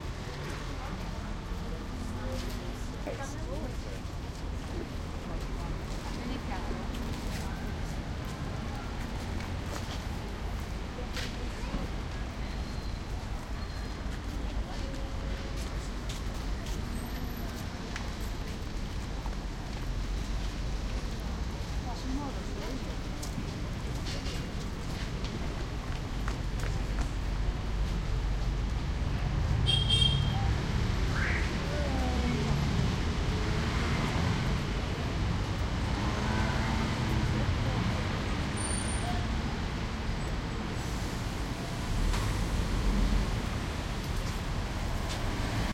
PARIS TRAFFIC AND FTS
Crowds of tourists recorded by the side of Notre Dame in Paris.
crowd, footsteps, paris, traffic